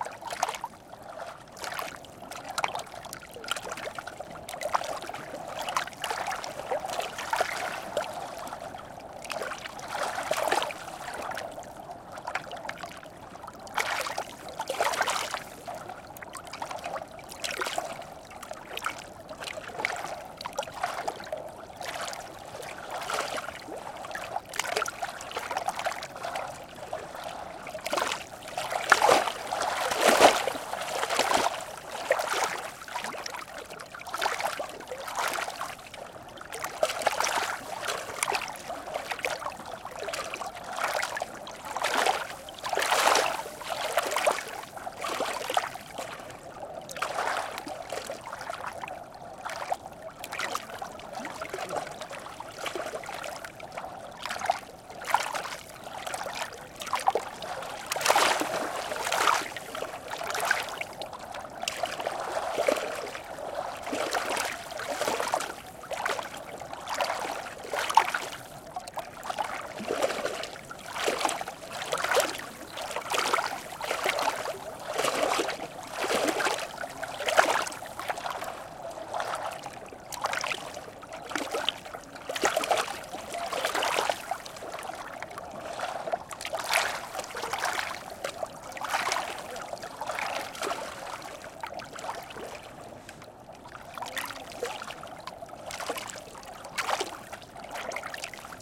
20090826.lake.waves.01
Small waves splash (very softly) in a front-glacier lake (with an impossible name, but see Geotag) in South East Iceland. Shure WL183, FEL preamp, Edirol R09 recorder
lake, field-recording, waves, iceland, water, nature